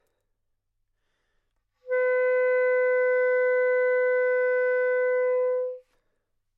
Part of the Good-sounds dataset of monophonic instrumental sounds.
instrument::clarinet
note::B
octave::4
midi note::59
good-sounds-id::3300